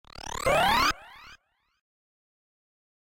I used FL Studio 11 to create this effect, I filter the sound with Gross Beat plugins.
fx, lo-fi, robotic, sound-design, digital, game, sound-effect, electric, computer